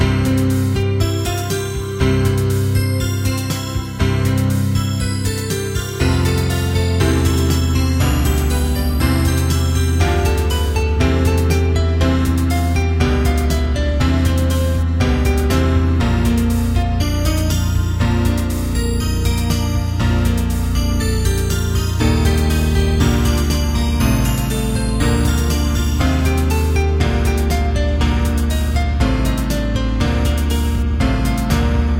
Short loops 14 03 2015 4
made in ableton live 9 lite - despite many crashes of ableton live 9 lite
;the program does not seem to work very well on my pc - luckily the program has
built in recovery for my midi projects after crashes occur.
- vst plugins : Balthor, Sympho, Alchemy, ToyOrgan, Sonatina Flute- Many are free VST Instruments from vstplanet !
bye
gameloop game music loop games organ piano sound melody tune synth ingame happy bells
music, sound, loop, synth, melody, bells, games, game, gameloop, tune, organ, ingame, piano, happy